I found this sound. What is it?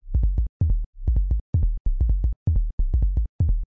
alkebass edit
Bassline Sequencer loop
Sequencer, Bassline, loop